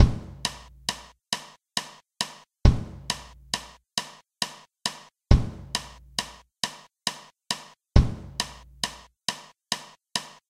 A drum pattern in 6/8 time. This is my second pack.
06, 06-08, 08, 6-8, 8, drum, full, kit, pattern